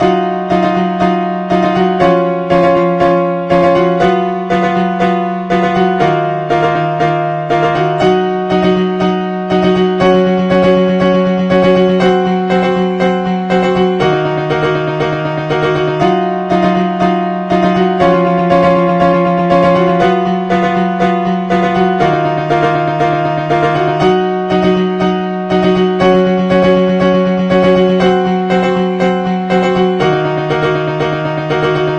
Agh it's like some demented person playing my piano . why god why . please make it stop or i'll run away .
Just messing :)
From the pack Piano Loops, more coming soon
demented, discord